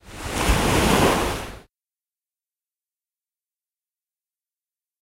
sample of a wave crashing a side of a ship or rocks
boat, sea, ship, water, wave